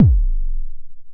Just some hand-made analog modular kick drums
Synth, Modular, Kick, Recording, Analog